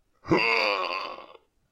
Zombie Hit
sound of a zombie getting hit
Hit, Undying, Zombie